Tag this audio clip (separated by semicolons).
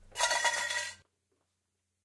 can; fall; jangle; junk; ring; trash